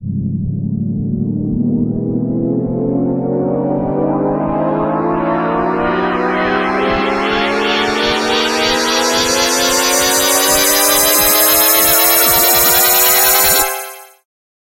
This sample was created in Ableton Live 9 using various synths and layering.